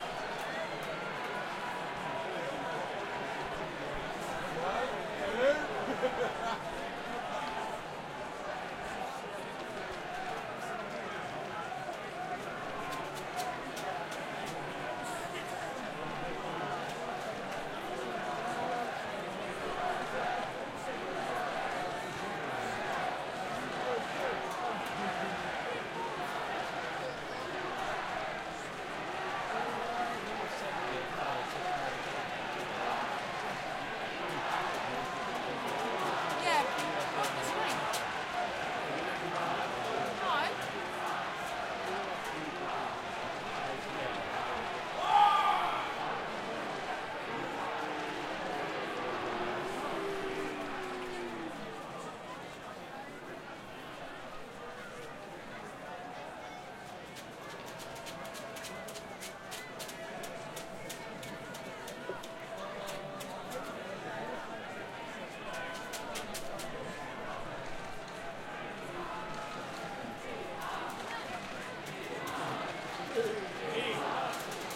Crowd Ambience
field-recording, ambience, rugby, crowd, football, USA, shouting, Japan